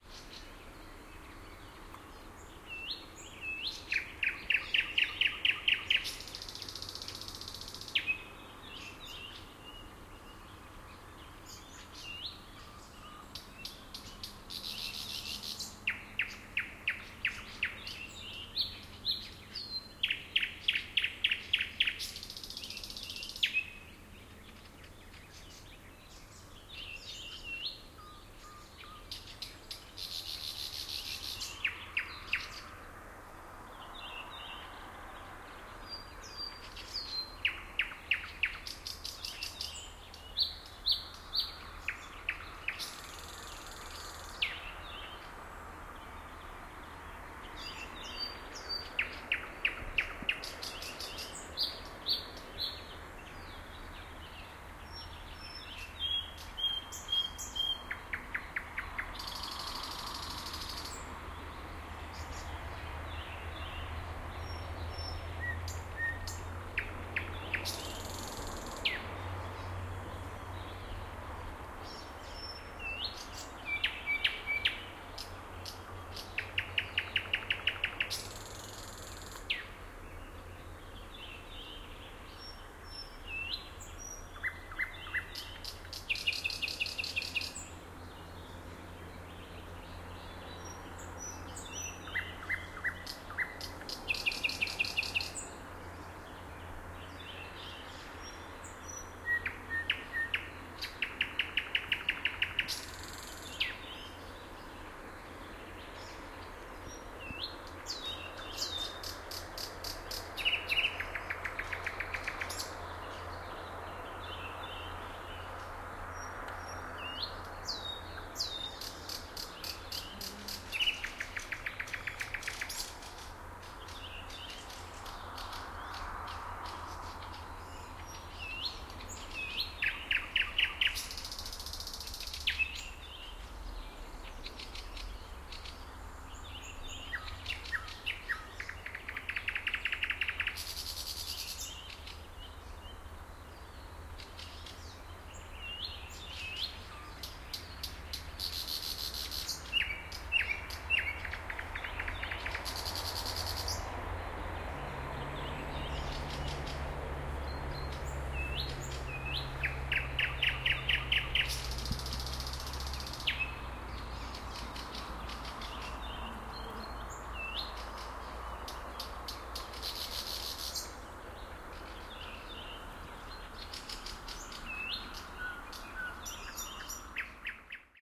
Binaural Nightingale
Nightingale singing recorded in western Finland. Distant traffic and other birds on the background. Gear: Sharp Minidisc, Soundman OKM binaural microphones.